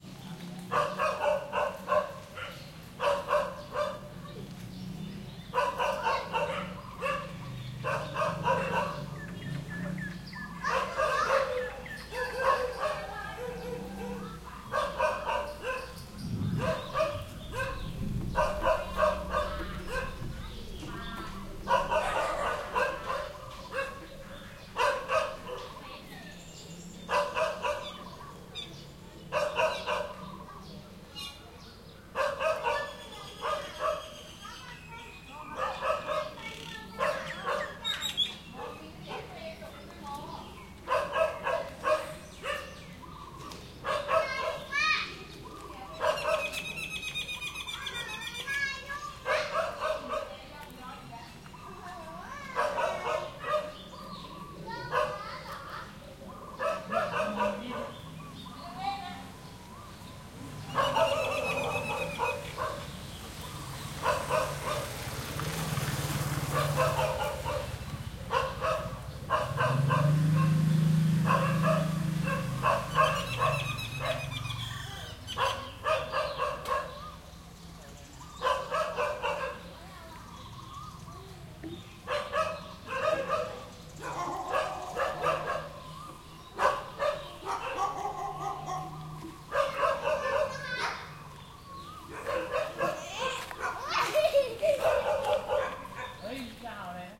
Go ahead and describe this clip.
JJMFX Ayutthaya dogs barking with voices and bikes
Ayutthaya, Barking, Dogs, Hectic, Thailand